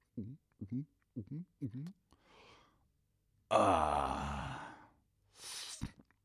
Drinking sounds.
Recorded for some short movies.